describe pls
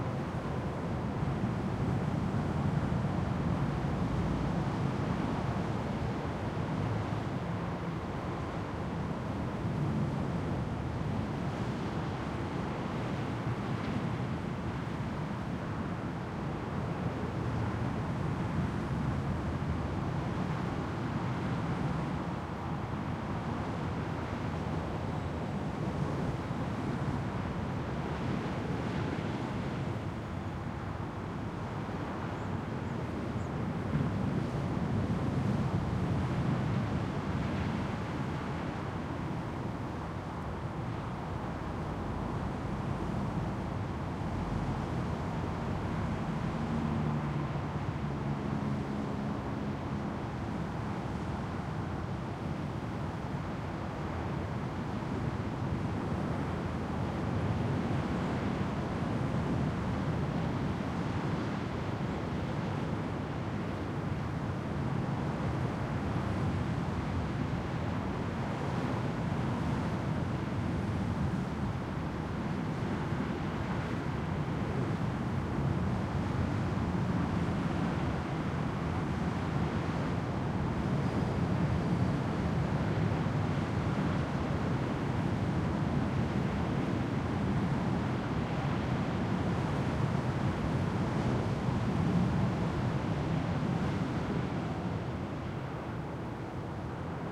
4ch field recording of a small rest area next to a German motorway, the A5 by Darmstadt. It is high summer afternoon, the motorway is fairly but not excessively busy.
The recorder is located on the parking strip, facing the motorway.
Recorded with a Zoom H2 with a Rycote windscreen.
These are the REAR channels, mics set to 120° dispersion.